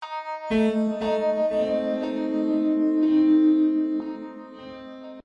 CR - Outer space keys
Good day.
Piano, reversed.
Support project using